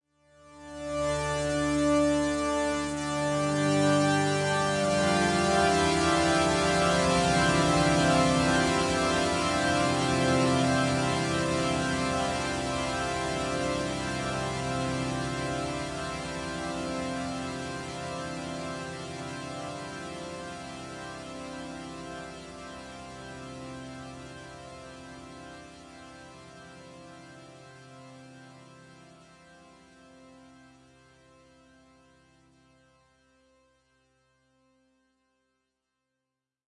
Some cinematic pads.